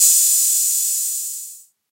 OHH RD-6
Samples from my Behringer RD-6 SR analog drum machine, which is a clone of the legendary ROLAND TR-606. BD, SD, CLAP, CHH, OHH, CYMBAL, LT, HT.
Recorded with a Behringer UMC 404 HD.